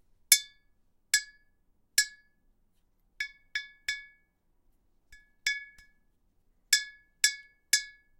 Me gently tapping a glass bottle with a piece of metal.